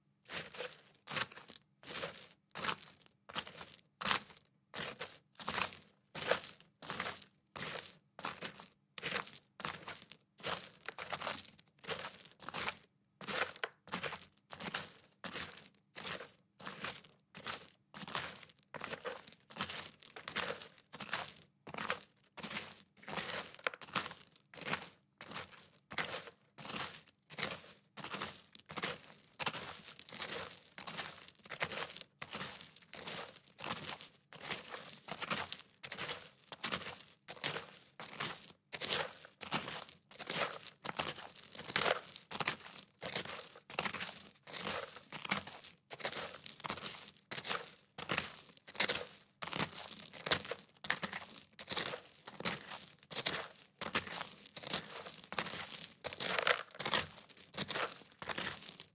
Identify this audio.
Footsteps Walking On Gravel Stones Medium Pace
Asphalt, Beach, Boots, Clothing, Concrete, Fabric, Fast, Footsteps, Gravel, Loose, Man, medium-pace, medium-speed, Outdoors, Path, Pavement, Road, Rock, Running, Sand, Shoes, Sneakers, Snow, Staggering, Stone, Stones, Trainers, Trousers, Walking, Woman